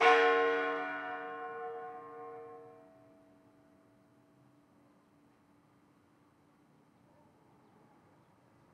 Church Bell 02
Antique, Bell, Plate, Ringing